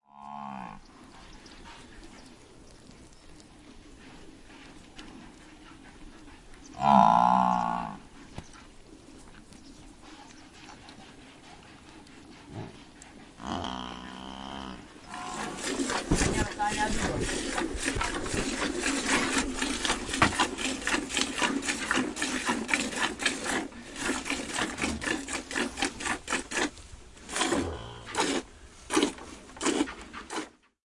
romanian buffalo milking
A Romanian buffalo seems to be dissatisfied, making noise. A female buffalo is milked by hand. The milking takes place near the village of Székelydálya (H)/Daia (RO)in Hargitha in Romania.
field-recording, buffaloes, milking